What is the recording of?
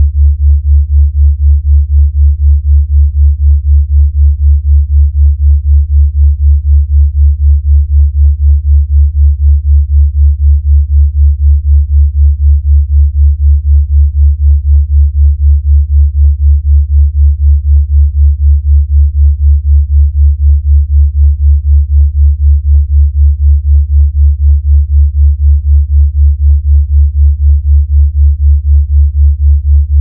This Delta Binaural beats is exactly 4Hz apart and loop perfectly at 30s. Set at the low base frequency of 70Hz and 74Hz, it's a relaxing hum.